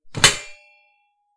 The clang of setting down a stainless steel drip tray.